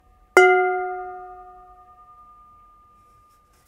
pan hit3
hit a pan
hit, kitchen, pan